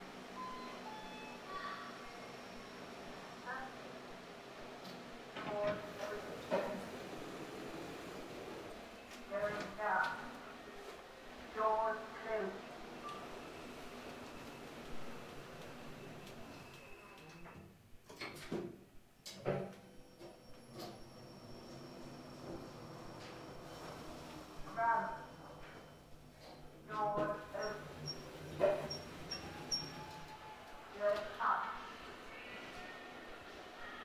Recording of a lift at my local Tesco.Done for a college project.Recorded using a handheld Zoom H-1 V2 using the onboard mics & a pair of Grado SR-60 headphones.